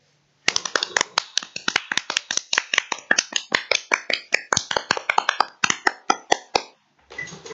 golf clap
Me and some friends clapped into a microphone. This kind of sound is when you don't exactly want a standing ovation but you still need a clap. A bit like at a school assembly where they don't really do much but everyone still has to clap, or if they are the kinds of people who don't really go crazy over anything.
echo, applause, hand